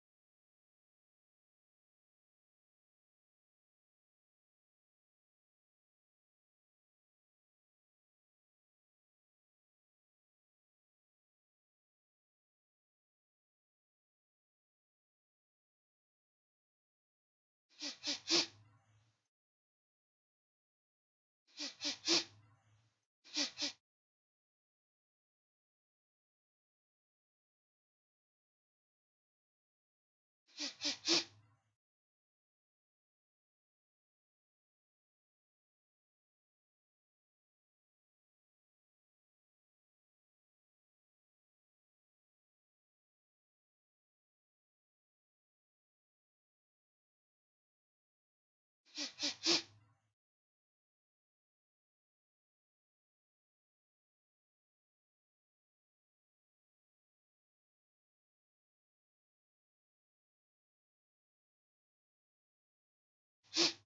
31-Perro olfato-consolidated
Dog, Smell